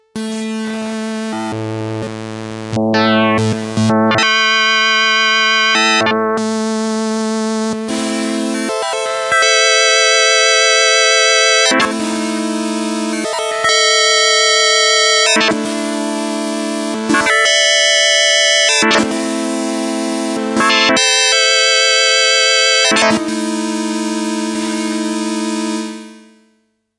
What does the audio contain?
Yamaha PSS-370 - Sounds Row 3 - 01
Recordings of a Yamaha PSS-370 keyboard with built-in FM-synthesizer
PSS-370, Yamaha